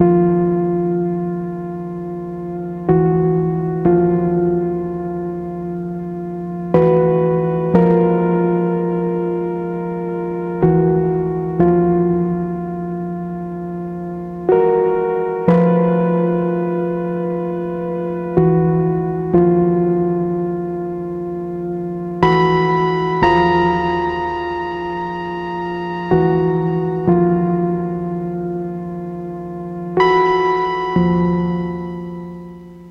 Circuit 7 - Keys 2
Synth Loop
124 BPM
Key of F Minor
lofi, psychedelic, loop, bass, industrial, oregon, electronic, synth, downtempo, experimental, synthesizer, evolving, noise, sample, portland, ambient, processed, hardware, music, percussion, dark, dance, analog, beat, digital, electronica